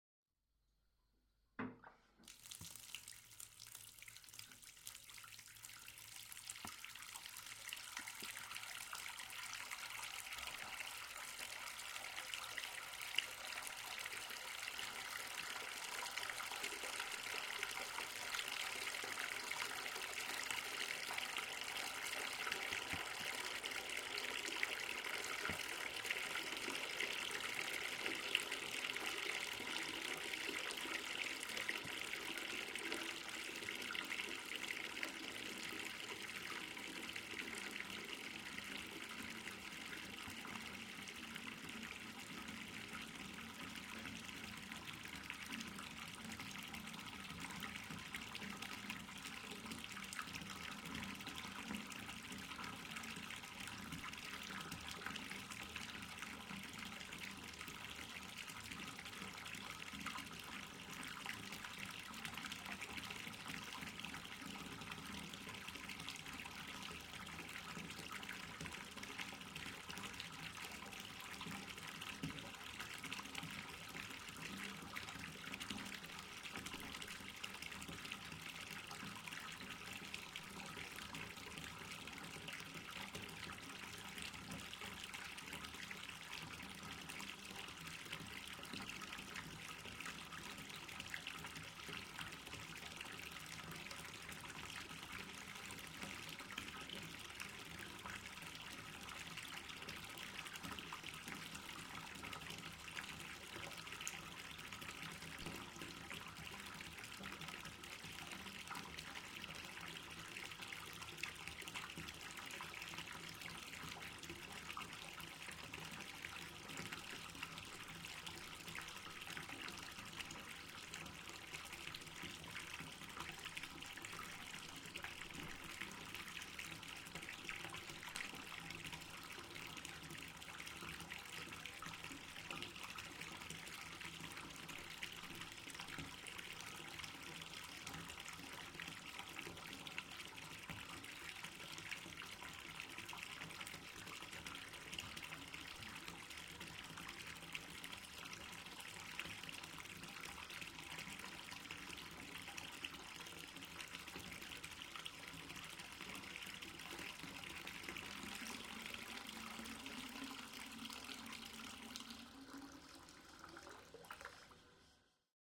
Filling up a bath
The sound of a bath being filled up. From tap turn on to tap turn off. Recorded by placing the mic a few feet above the tub. Recorded using a behringer c2 and an m-audio projectmix i/o. No processing, just topped and tailed
rushing, field, tub, recording, water, Bath